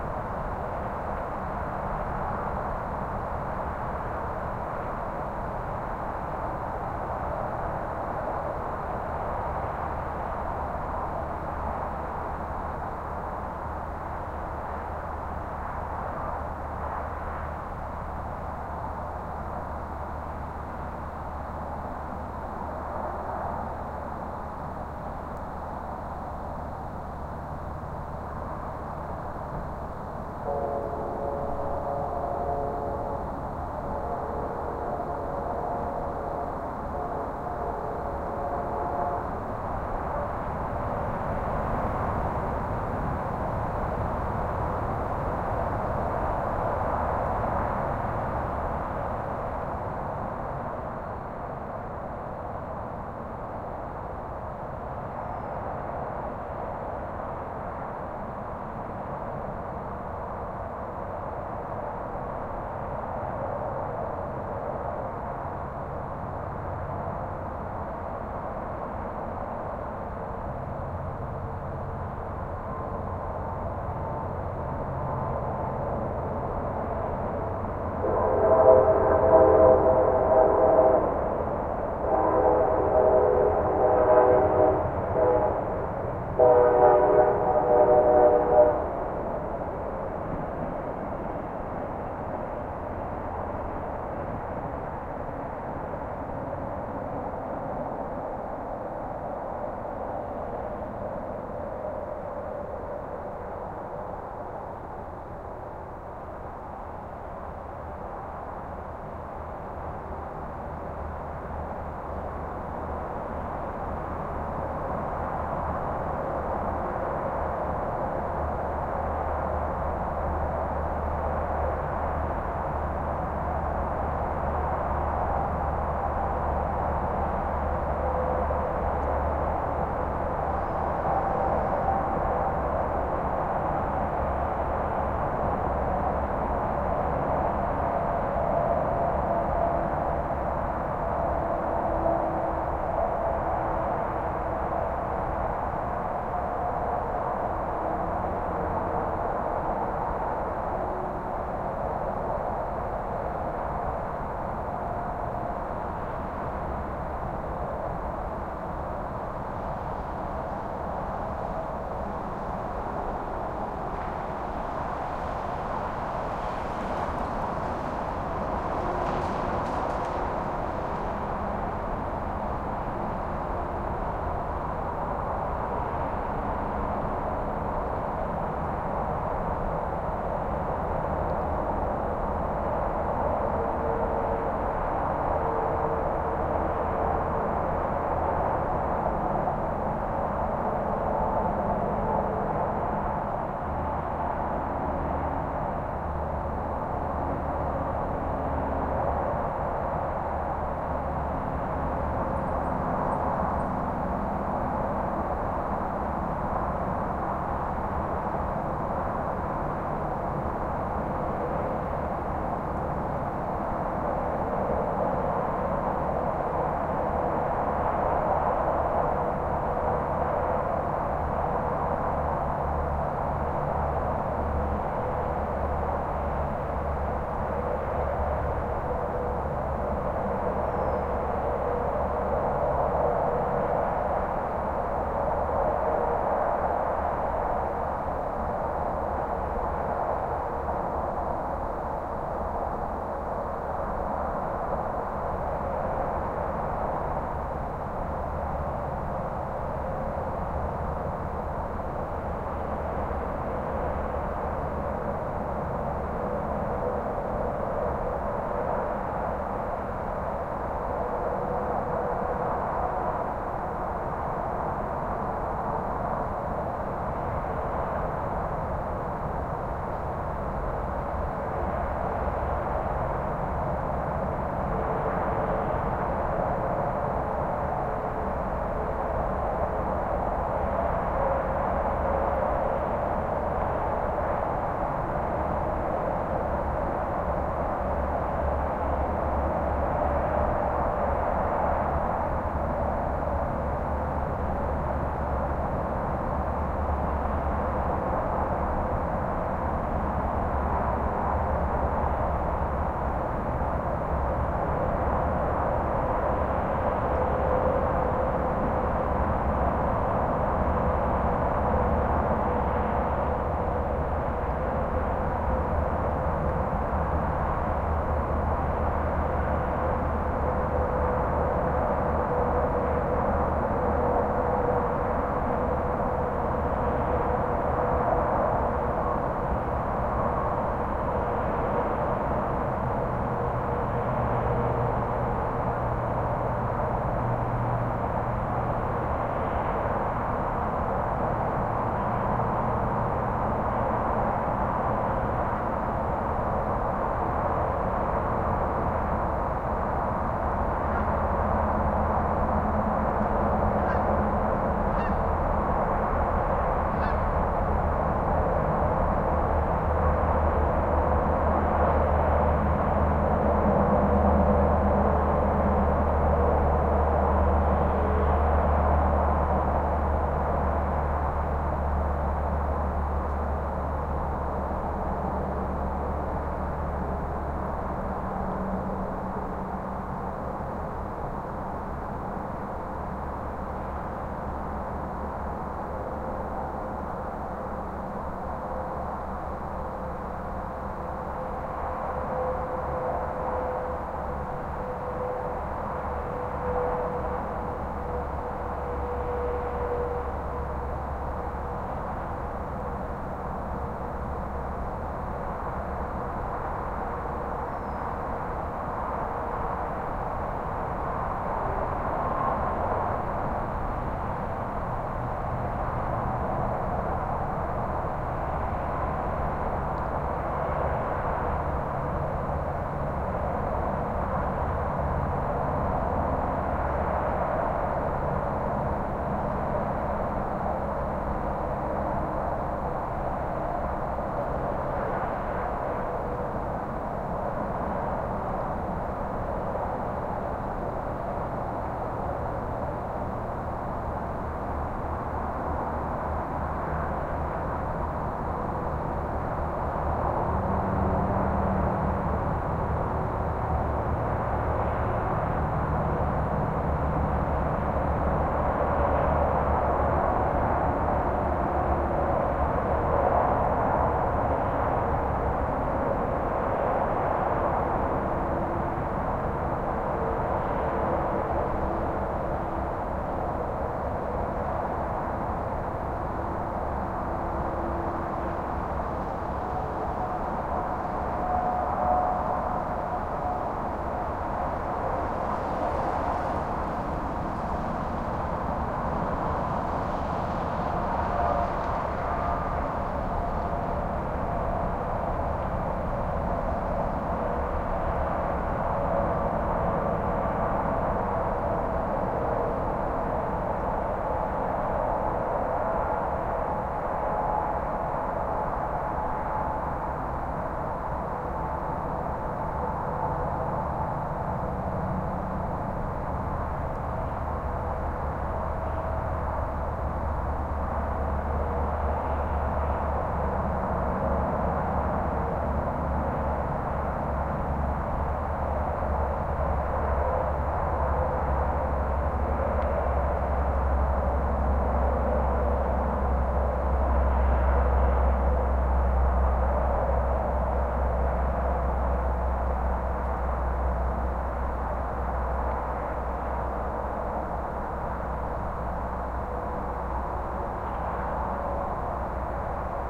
skyline highway traffic distant far or nearby haze from campground with some crickets and occasional slow car pass by +distant freight train toots and creepy truck engine brakes
haze, skyline, highway, far, distant, traffic, campground, crickets